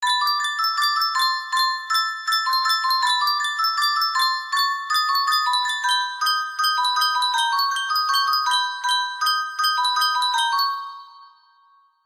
Random Music box sound
box, music, sample